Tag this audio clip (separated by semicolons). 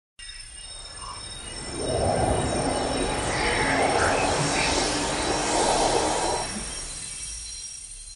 image; spectrogram